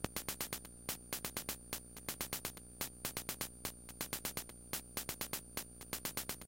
Nano Loop - Noise 1
I was playing around with the good ol gameboy.... SOmethinG to do on the lovely metro system here in SEA ttle_ Thats where I LoVe.....and Live..!